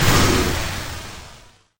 hookbomb impact
sounds, game, games